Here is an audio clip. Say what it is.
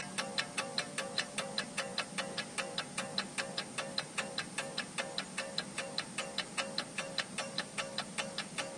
kitchen clock 2
Tic-tac of an old battery powered kitchen clock from the sixties, recorded with the mic in 10 cm distance. Marantz PMD 671, Vivanco EM35